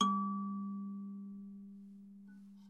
Totally awesome Kalimba, recorded close range with the xy on a Sony D50. Tuning is something strange, but sounds pretty great.